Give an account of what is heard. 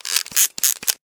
one use camera load3
load of a one use camera.recorded with sm 58 mic in mackie vlz and tascam da 40 dat.
one-use, load, camera